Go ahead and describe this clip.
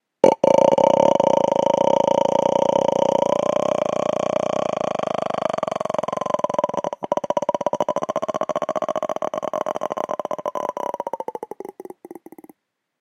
Voice Horror
Horror, stress, Voice